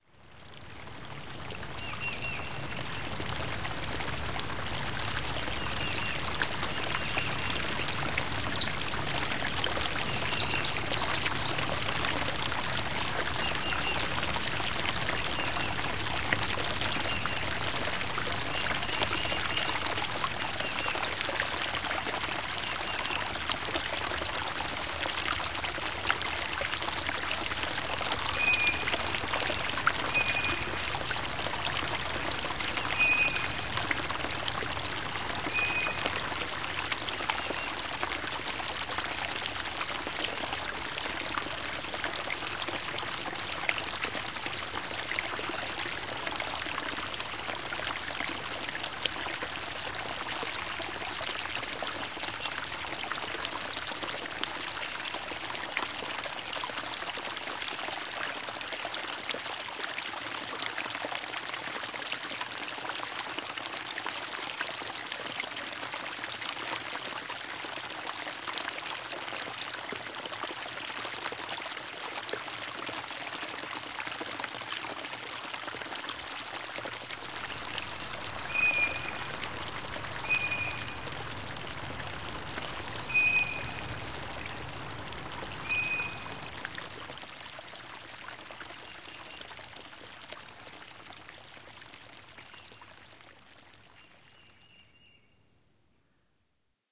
SpringCreekWBirdsApril20th2013
A wonderful spring day in the midwest woods resulted in this recording of a beautiful small creek, deep in the woods...enjoy...you will also hear various birds from time to time.
I used my H4N and its internal microphones.